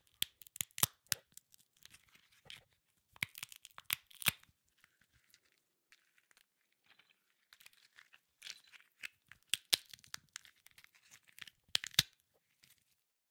Shelling walnut
Sound of peeling of walnut.
crack, walnut, peel, shell